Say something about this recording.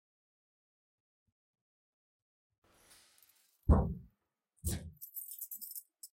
Locking of school locker
Czech, Panska, CZ